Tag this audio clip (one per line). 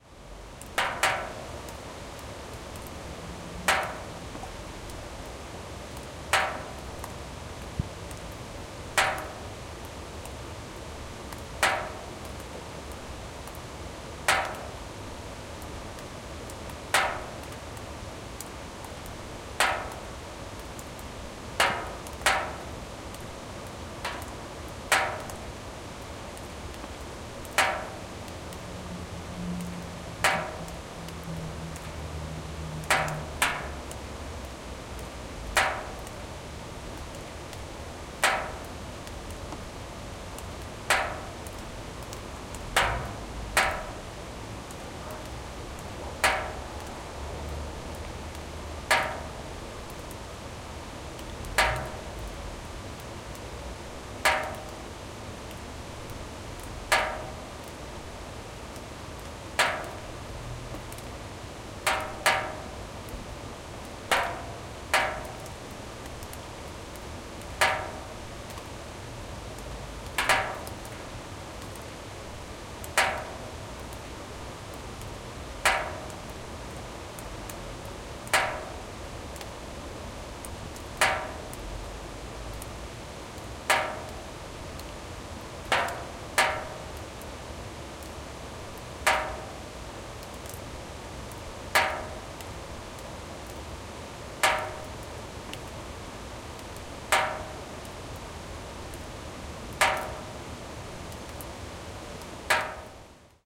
metal
dribbling
swoosh
river
beating
sheet